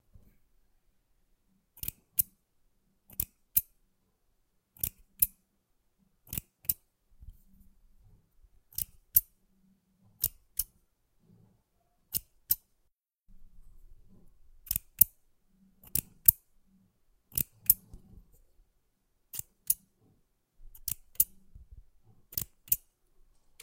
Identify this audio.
The sound of my thread snips being used. Useful for scissor cutting sound effect!